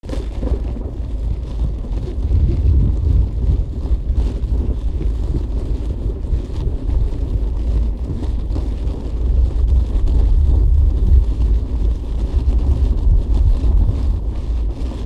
Underground Noise
This is a sound effect made for our radio show that I wanted to share. I wanted to replicate what I imagined it would sound like to be traveling through the earth. In the story, the character is drifting through bedrock as a ghost, but it could also be the sound of a machine boring through a tunnel. We made it with a yogurt can, some pebbles, a few doritos, and then manipulated it with effects. Recorded on my iPad.
rocks, stone, underground, ambient, dirt, earth, noise, sound-effect, bedrock